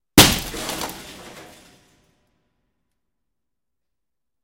Windows being broken with various objects. Also includes scratching.
break, breaking-glass, indoor, window